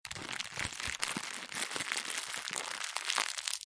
Wrapper Flare / Pop